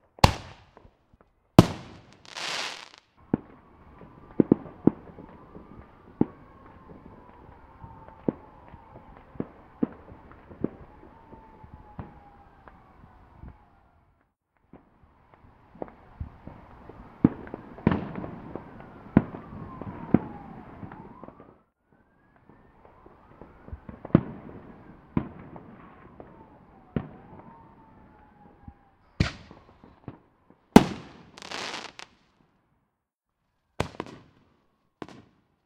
A couple recordings spliced together. Some police sirens in the background. Recorded with Zoom H6 in a suburban neighborhood.